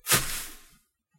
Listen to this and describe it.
Landing on grass
Noise of an object or a person landing on a grassy surface.